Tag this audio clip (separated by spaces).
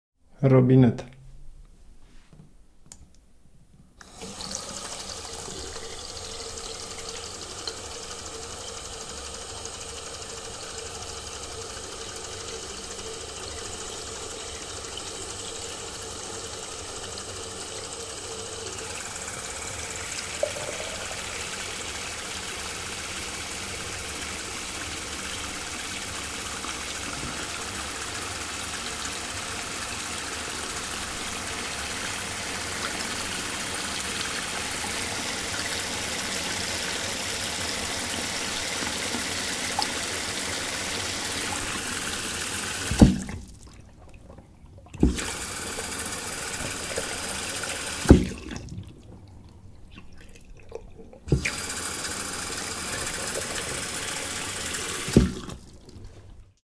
tap bathroom-tap tap-water